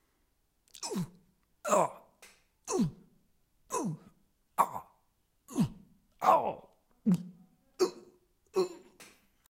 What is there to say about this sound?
Flinch SFX 1
these are flinch sounds recorded on a samson condenser microphone
fight, punch, voice, fist